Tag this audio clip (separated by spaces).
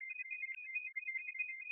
alarm detector gaz high kitchen sensor siren smoke warning